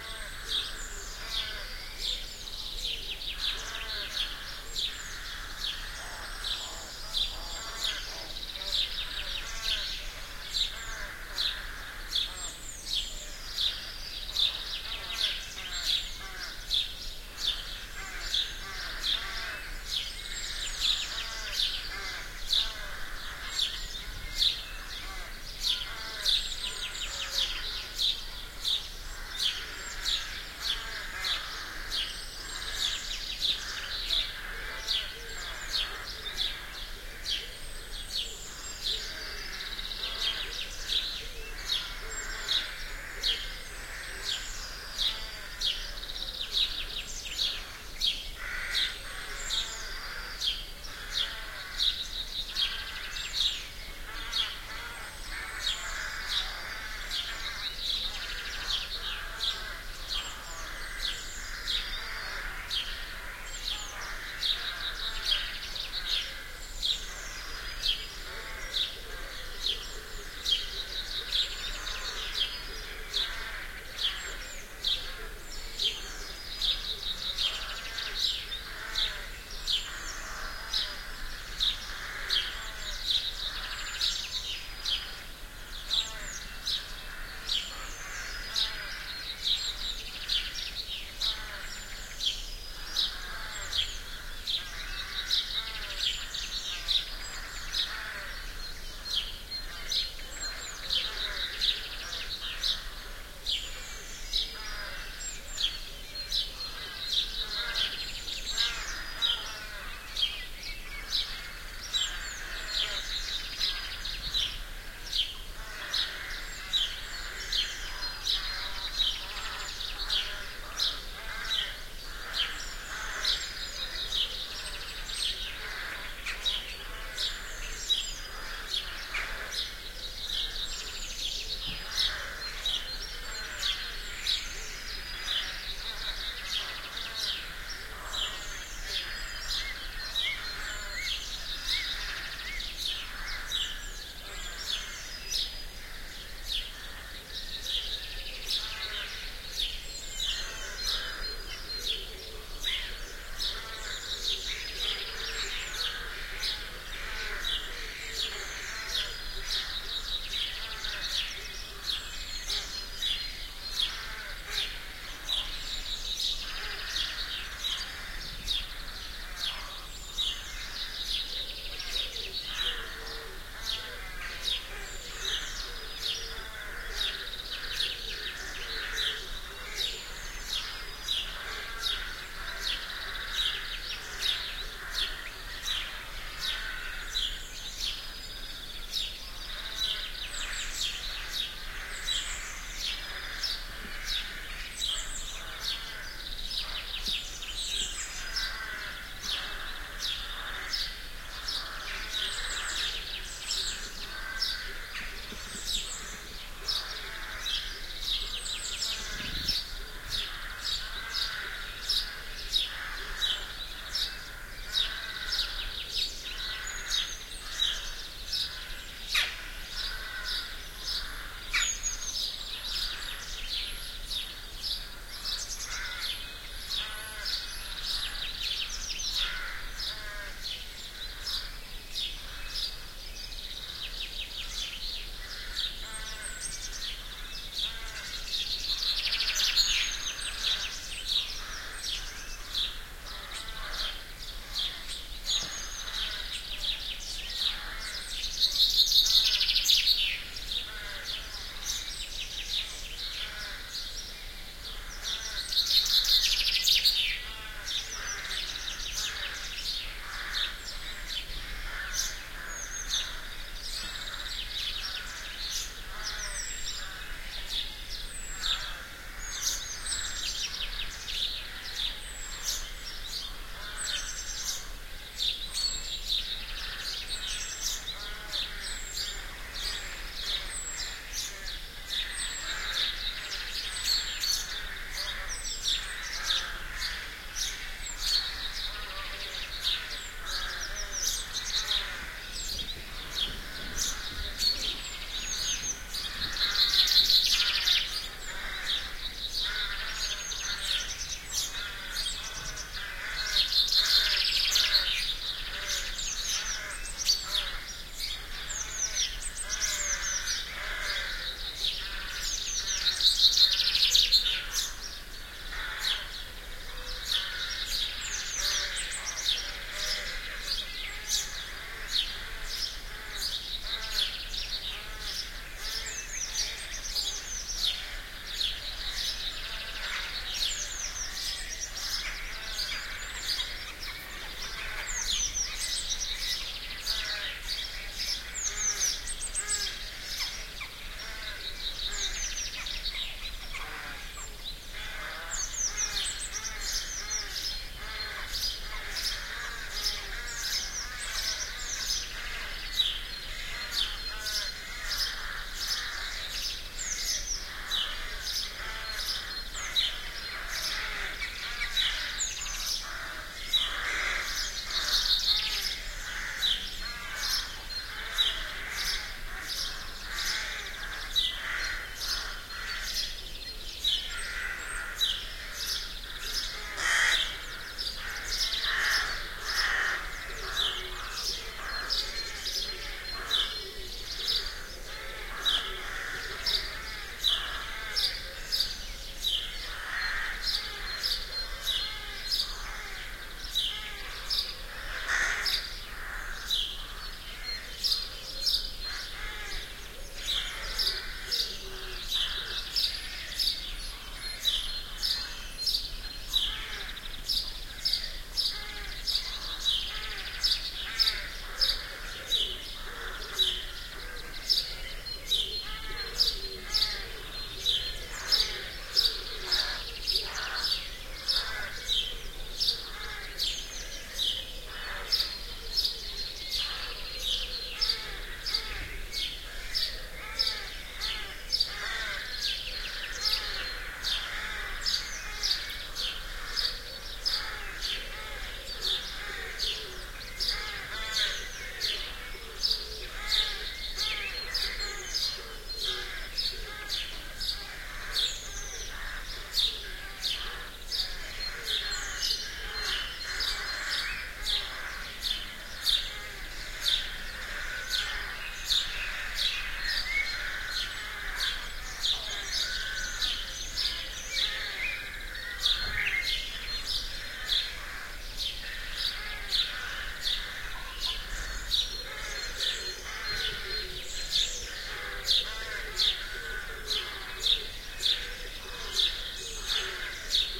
Scottish Village birds
It was early in the morning in May when I recorded this track in Aberfeldy / Scotland. I used the Soundman OKM II microphones in the boundary layer way and a Sony TCD-D7 DAT recorder. Plenty of craws and sparrows.